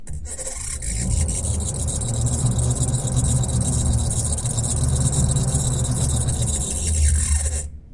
A recording of a broken elliptical exercise machine. Recorded with a Zoom H4 on 27 May 2013 in Neskowin, OR, USA.
accelerate, decelerate, elliptical, engine, exercise, hum, machine, motion, motor, squeak, whir